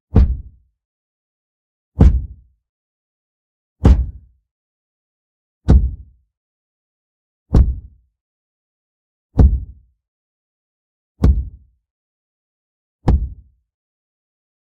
8 new thump sounds with more high freq-components.
Slammend the door of my washing machine with a contact mic attached for higher frequencies.
The low end sound used is thump no.2 from the previously uploaded "AlmostThereThumps"